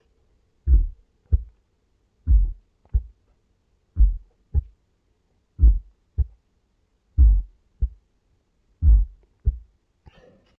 One Heartbeat Dragon other Creature
Dragon, etc, Heartbeat